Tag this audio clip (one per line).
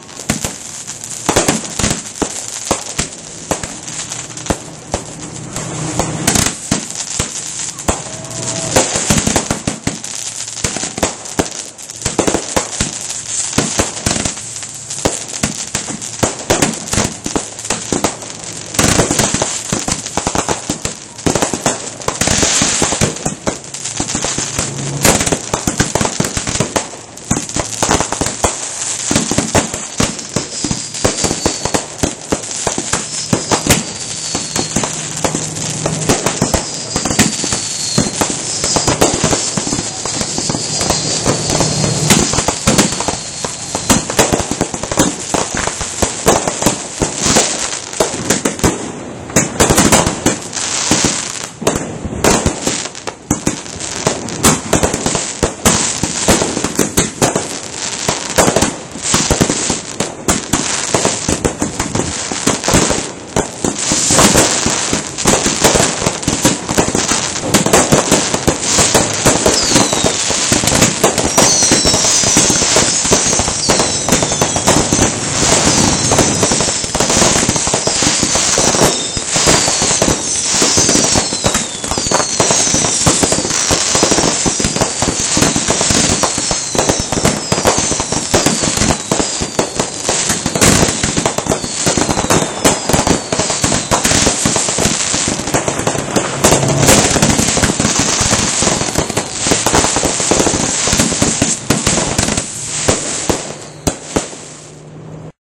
aerial-repeaters
explosions
shells
whizzlers